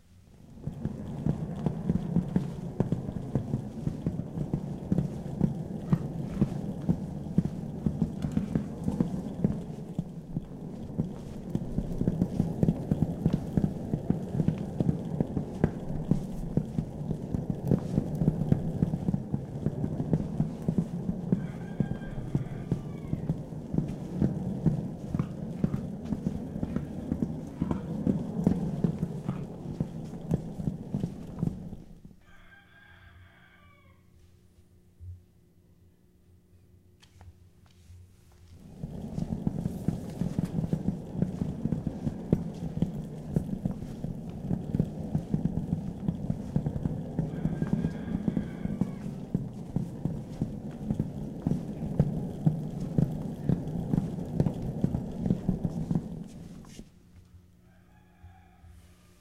sonido de una maleta de ruedas en movimiento. sound of a suitcase with wheels in movment